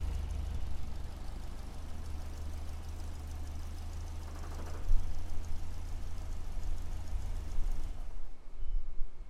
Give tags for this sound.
beetle car engine idle road running Volkswagen

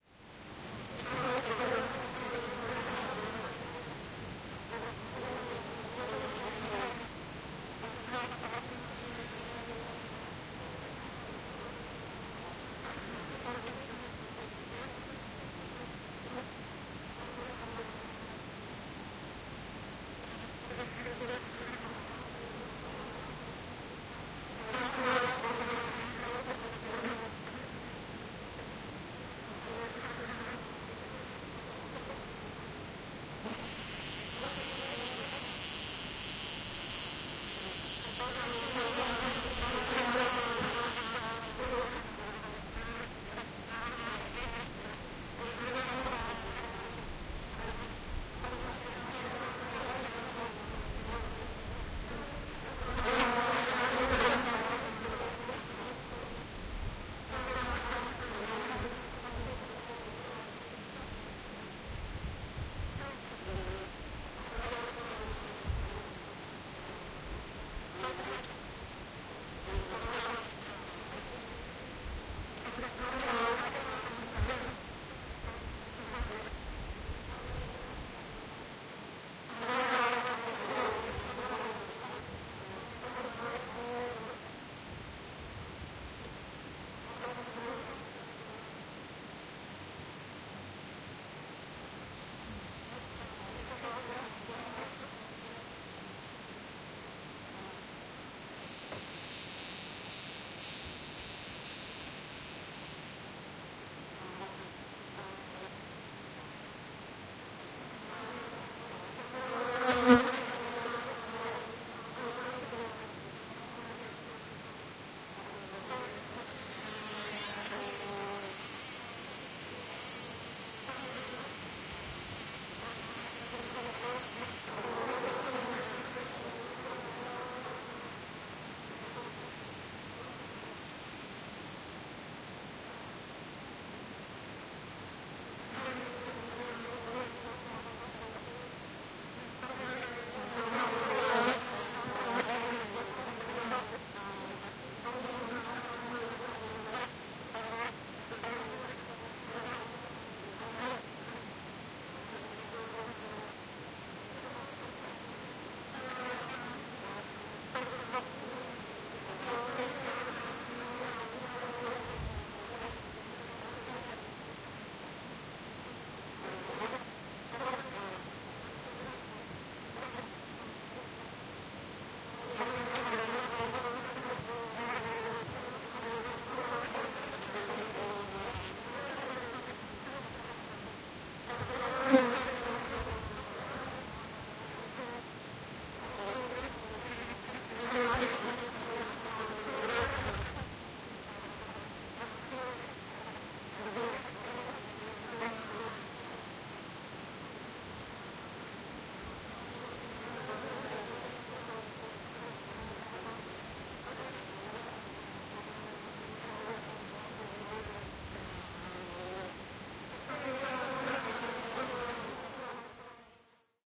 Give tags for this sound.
Insects Surina Fly Finnish-Broadcasting-Company Summer Spring Nature Finland Soundfx Yle Suomi Luonto Insect Field-Recording Tehosteet Yleisradio Buzz

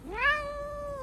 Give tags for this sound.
annoyed cat

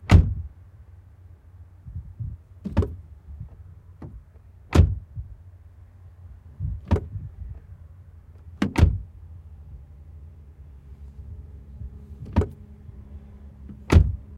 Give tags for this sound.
door close renault duster open

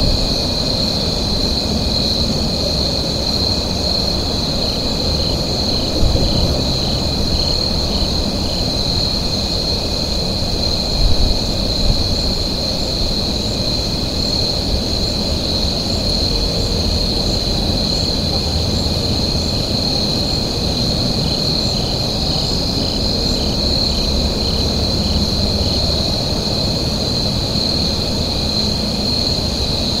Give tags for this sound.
ambiance Cincinnati crickets dusk field-recording loop loopable nature night nighttime

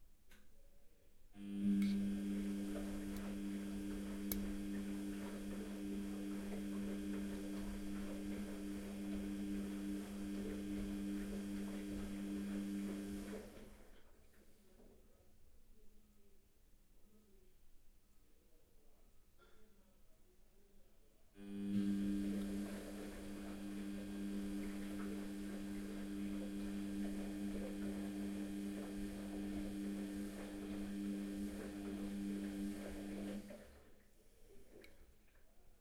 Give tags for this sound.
washing machine automatic washer

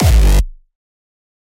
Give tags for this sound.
Bassdrum Hardcore Hardcore-Kick Hardstyle Hardstyle-Kick Kick Rawstyle Rawstyle-Kick

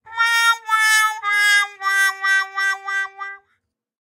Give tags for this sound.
comic funny crying comedy cartoon wah cry brass trumpet sad

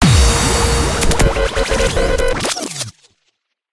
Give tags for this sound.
imaging wipe

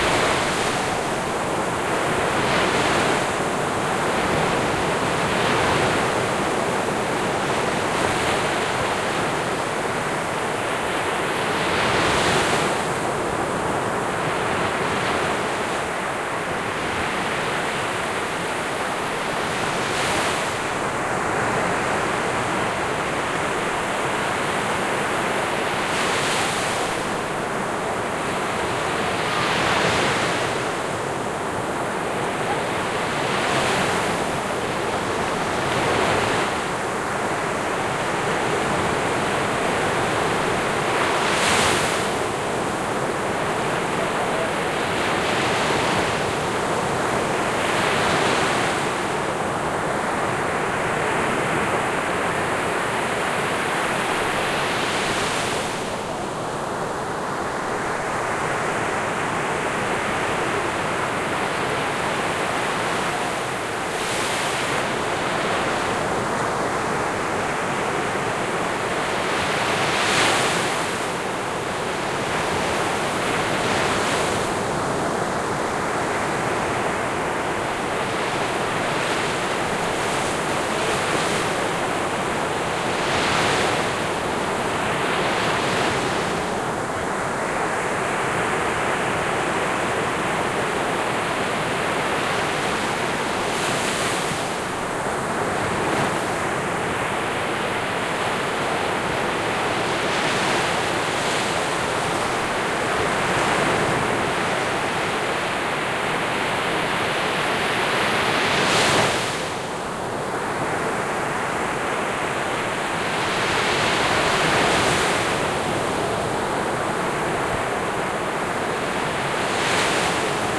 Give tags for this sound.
sea soundscape Cornwall waves nature atmosphere field-recording ambience beach children-playing England

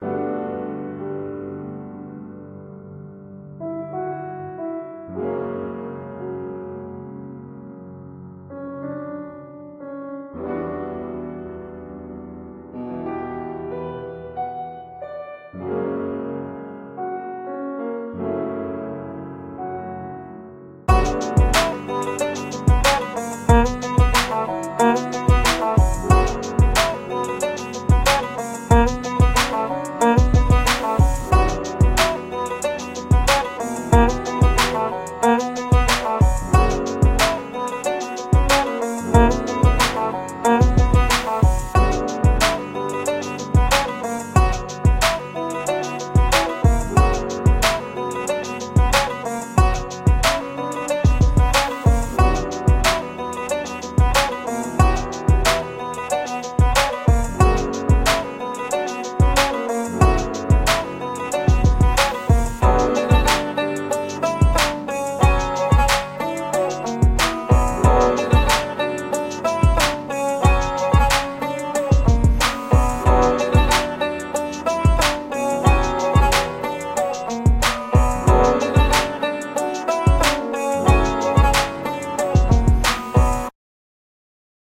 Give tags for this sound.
Beat Free Guitar Music Piano Sound

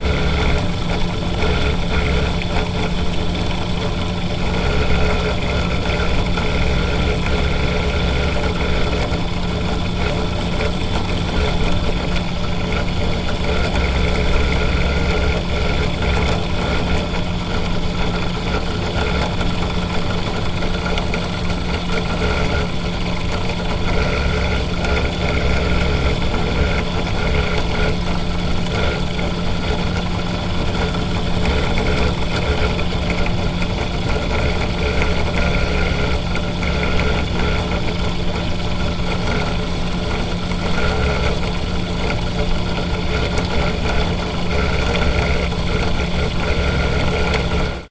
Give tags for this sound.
fan motor noise printer sputter

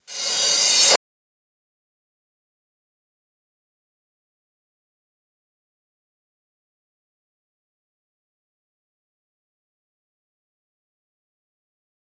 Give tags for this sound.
cymbal cymbals echo fx metal reverse

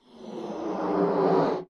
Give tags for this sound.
balloon bigger expand stretch

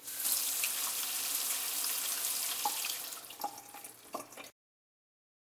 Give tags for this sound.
sfx water

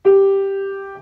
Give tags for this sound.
g note piano

